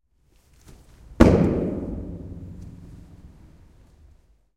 Single jump in a large empty tunnel, nice bit of reverb.
Zoom H1, luhd mics, deadcats, 2dB boost above 5kHz.